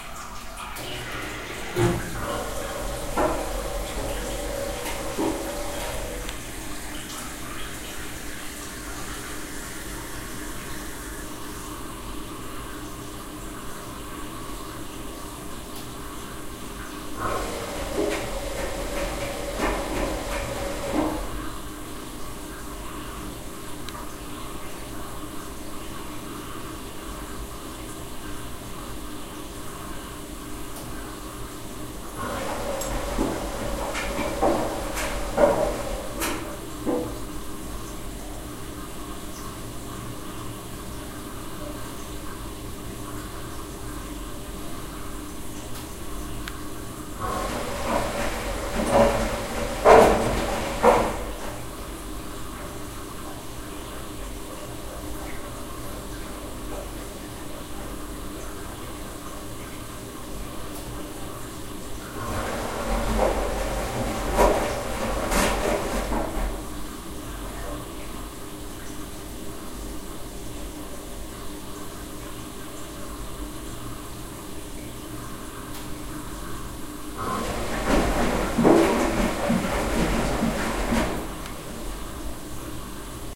The rumble comes from "pesupallo" that is rolling inside the washing machine.
kallio, listen-to-helsinki, locativesoundws09, sound-diary, torkkelinkuja